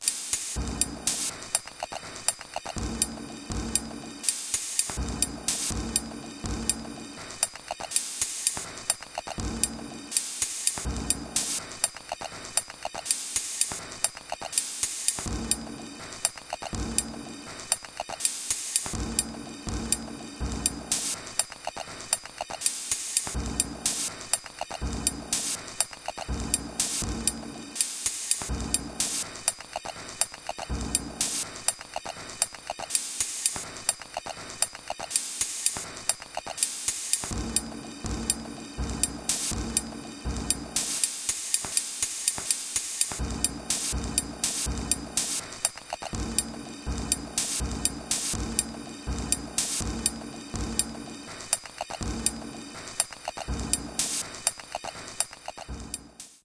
pd auto remix
Pure data patch.
This is a procedural remix of a track by drielnox.
data, pd, pure, remix